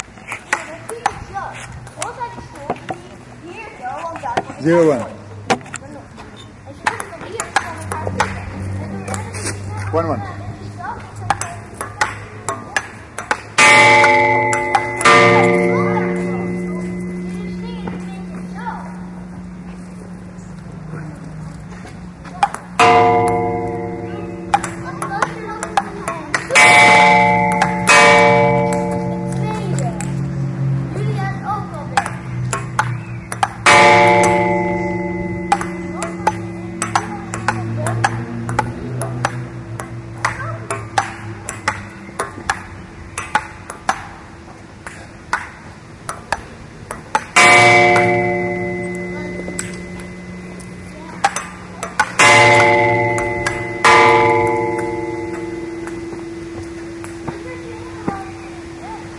Ping Pong
Recording of a ping-pong table in Utrecht